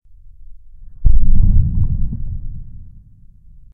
Dinosaur Far off dinosaur step
A simple 'far of dinosaur step' that I created. The 101 Sound Effects Collection.
roar, t-rex, breath, monster, step, dinosaur, creature, stomp, dino, growl